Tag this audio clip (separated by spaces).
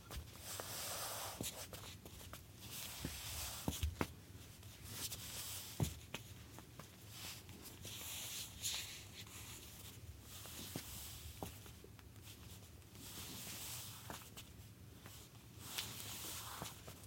female socks wood footsteps solid